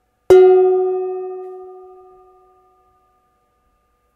pan hit6
hitting my kitchen pan
hit, kitchen, pan, pot